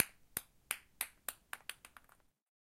Balle Ping-Pong 3
Ping Pong Ball
Ball, Ping, Pong